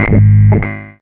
PPG 021 Fretless LeadSynth G#1
The sample is a part of the "PPG MULTISAMPLE 021 Fretless LeadSynth"
sample pack. It is a sound similar to a guitar sound, with some
simulated fretnoise at the start. Usable as bass of lead sound. In the
sample pack there are 16 samples evenly spread across 5 octaves (C1
till C6). The note in the sample name (C, E or G#) does indicate the
pitch of the sound but the key on my keyboard. The sound was created on
the Waldorf PPG VSTi. After that normalising and fades where applied within Cubase SX & Wavelab.
lead, multisample, ppg